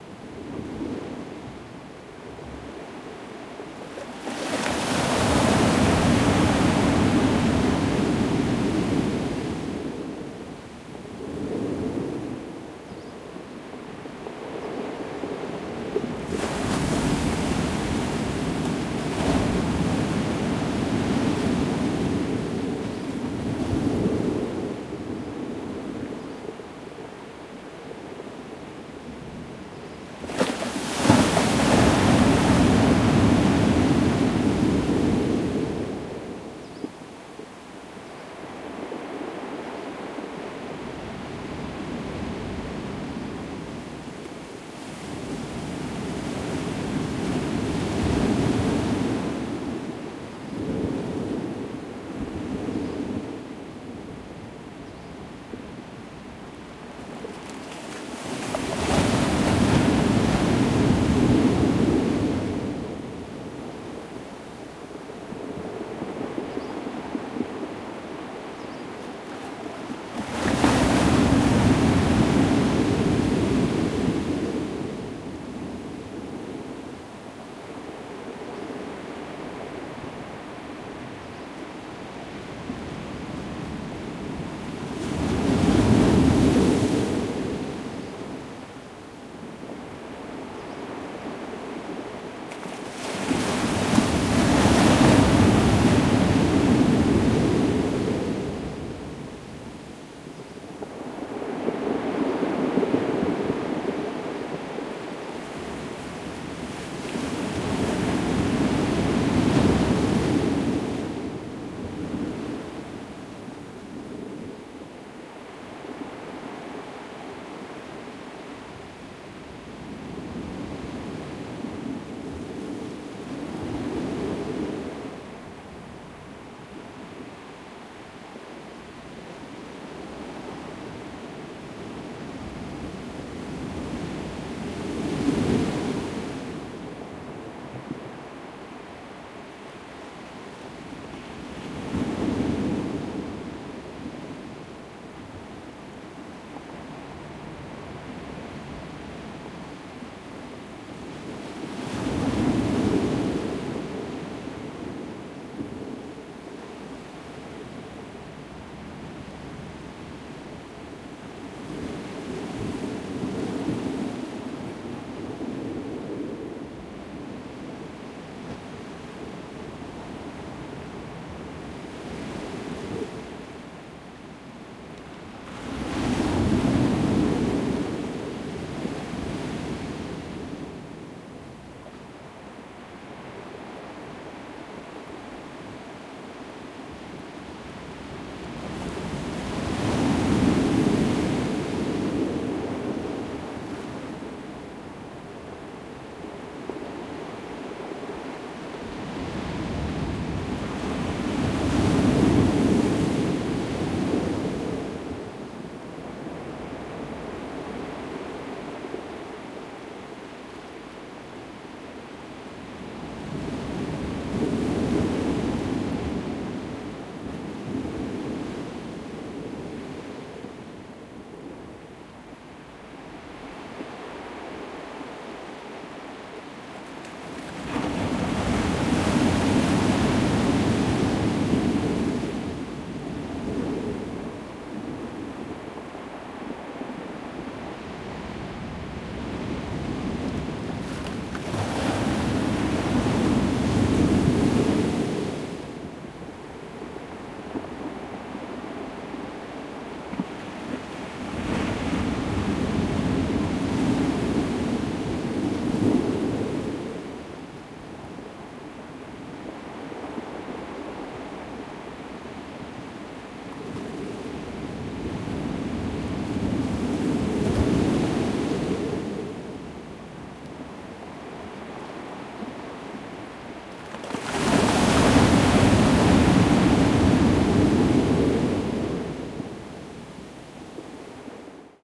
Ocean, Gran Canaria, Tasarte Beach
Taken at Tasarte Beach, a quite wild place in the south-west of Gran Canaria. An interesting sound, as the sound of the waves creates a kind of short echo or reverberation on the opposite cliff.
Atlantic, Beach, Gran-Canaria, Ocean, Sea, shore, Spain, surf, Tasarte-Beach, waves